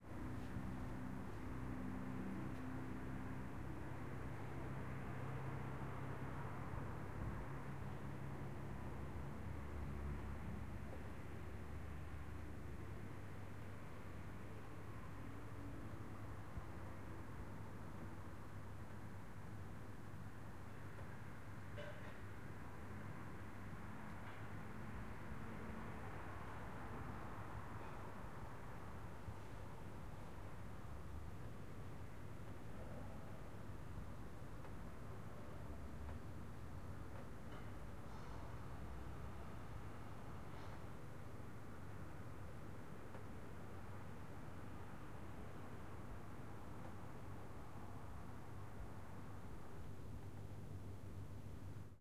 Residential building staircase open door roomtone